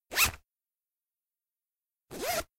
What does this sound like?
pursezipper openandshut home April2012
A close recording of opening and shutting the zipper on my purse/wallet. Recorded with a ZoomH2 for Dare12.